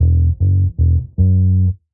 Modern Roots Reggae 13 078 Gbmin Samples